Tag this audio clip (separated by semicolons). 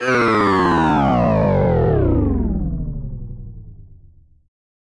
break; destroy; effect; free; machine; power-down; power-off; robot; shut-down; sound; sound-design